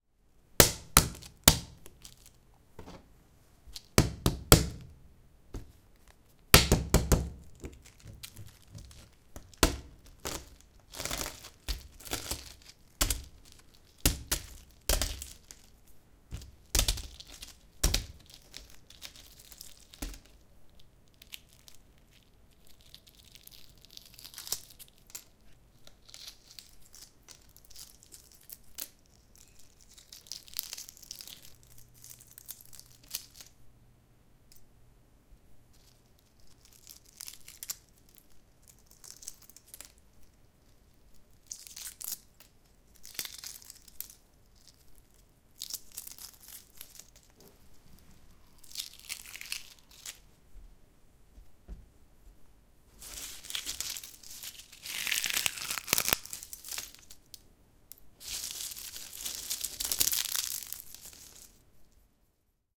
Peeling Cooked Egg
Peeling a cooked egg for breakfast.
Recorded with Zoom H2. Edited with Audacity.
breakfast, cooking, eating, egg, food, kitchen, peeling, preparation